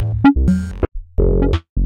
DW Loop 035
Some loops I made in Reaktor. They're made using a little wavetable-based synth which I built from scratch; the wavetable contains 32 home-grown waveforms, and the synth's parameters and effects are controlled by a bank of 16 sequencers. Good for glitch/minimal techno, and there are some nice individual bleeps/percussive sounds in there too. Enjoy!
glitch, techno, wavetable, loop, minimal, loops, reaktor, bleep